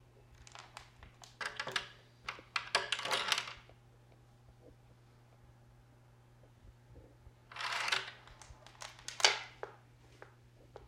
A chain lock on a door being locked and unlocked
deadbolt, lock, door